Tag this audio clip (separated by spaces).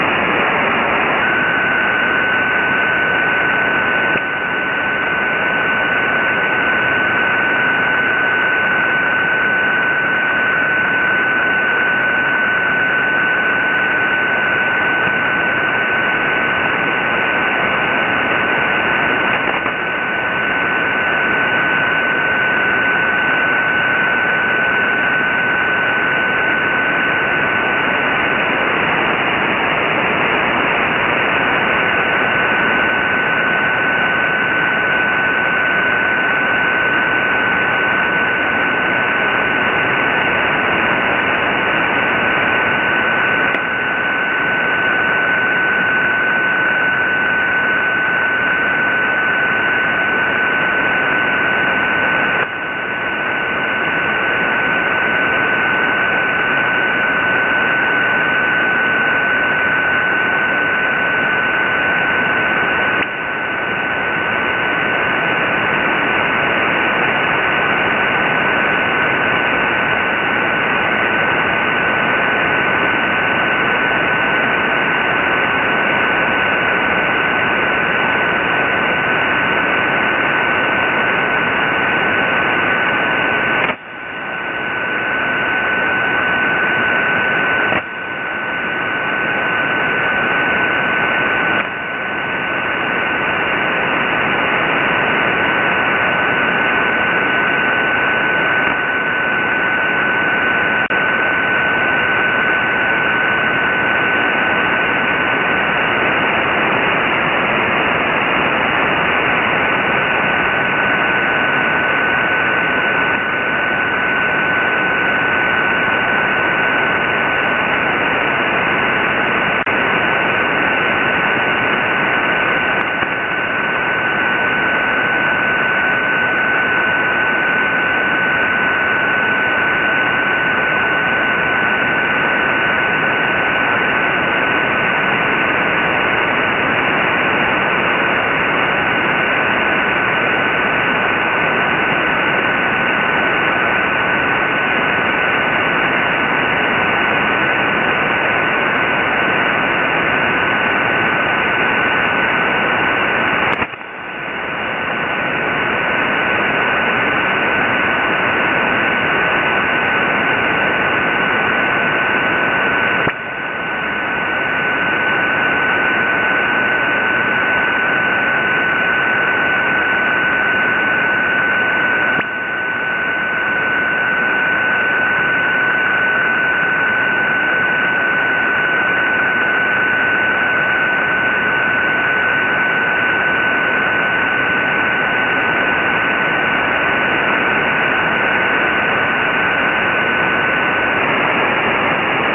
psk old static ham radio 31 amateur